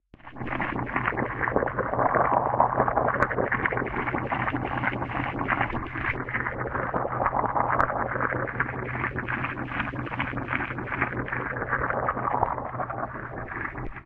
A small recording of a pot with spaghetti noodles boiling inside. This version is used with a wah and phaser effect to make it sound similar to a washing machine.